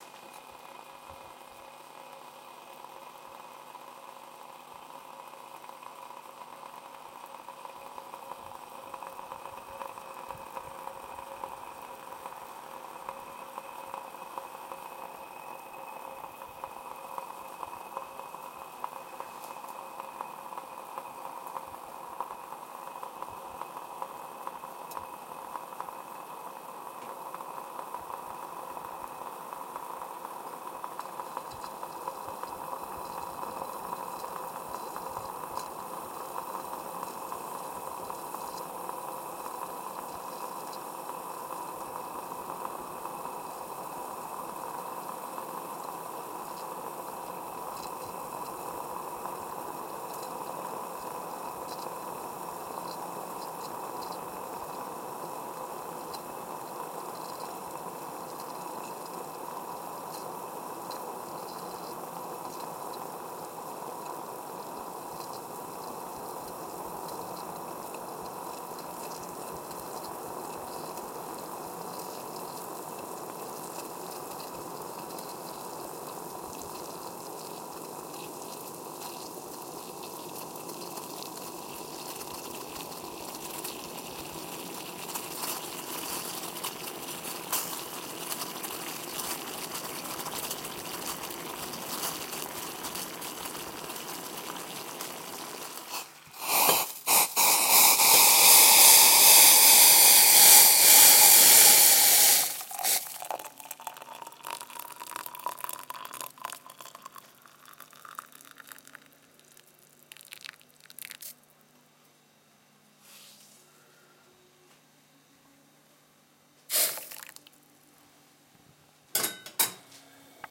water boiling
boil, boiling, heat, hot, metal, pour, pouring, water